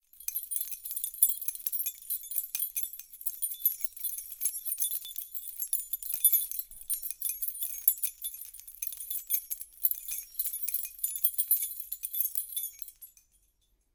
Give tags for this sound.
0
chimes
egoless
key
natural
shaking
sounds
vol

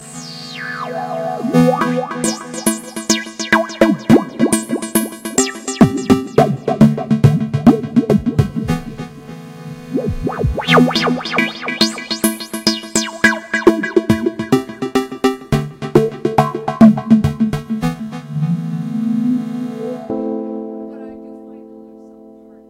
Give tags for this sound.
keyboard
progressive
psybient

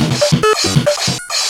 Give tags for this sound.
idm
loop
abstract

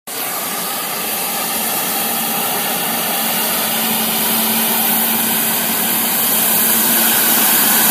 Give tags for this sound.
field-recording
street
hiss
sizzling
noise
hissing

